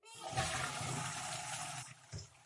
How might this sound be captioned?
Flushing toilet. Recorded with Nokia 5.1 android phone with it's internal microphone. Edited with Audacity.